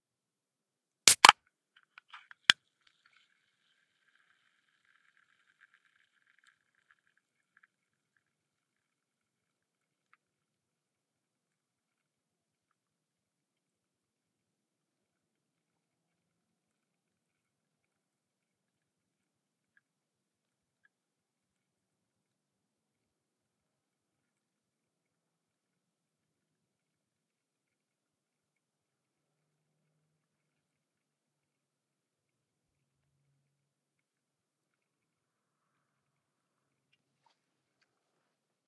A can of coke being opened with a little natural bubble / gurgle of the idle drink. Recorded in the Derbyshire countryside an hours walk out of Derby city center. Cleaned up in Izotope RX to remove the hiss that was present in the original.